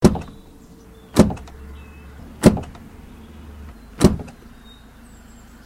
Car Door, Opening, A
Raw audio of several recordings of a car door being opened.
An example of how you might credit is by putting this in the description/credits: